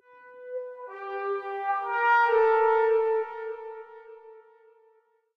An effected trumpet.
fx, trumpet